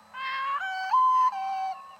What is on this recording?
yodeling blackbird
Short parts cut out of a blackbird song, played with 15 to 25% of the original speed leading to an amazing effect.
bird, birdsong, decelerated, delayed, reduced-speed, slowed, yodeling